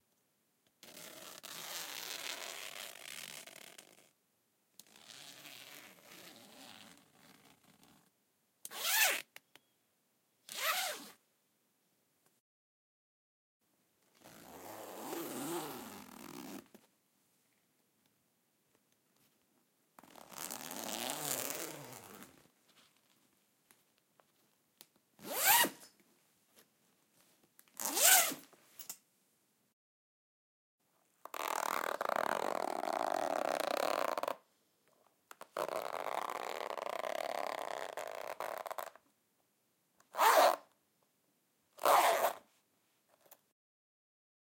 I recorded using a Zoom H6 with an XY mic and foam screen and tripod. I recorded various zip sounds at a slow pace and then fast pace in front of the mic. The first zip is from a jacket, the second is a travel bag, and the third is from a pencil case. Recorded for a school project for a SFX library.
down, OWI, up, zips